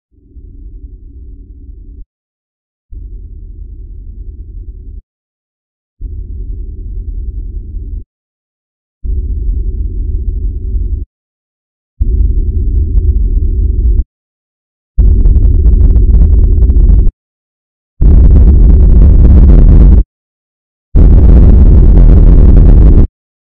Anger Progression
Anger in a truck progressing.